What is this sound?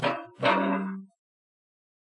gate opening in a game environment